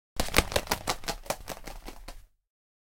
Bird flying away
2 rubber gloves flapping against each other
bird, horror, birds, flap, nature, woods, forest, wings